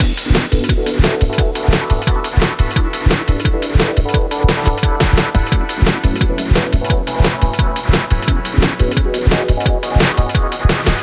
A loop made with an happy watery synth chords & an happy beat, done with fl7 @ 174bpm
b, bass, cut, d, dance, dnb, drum, flstudio, happy, loop, synth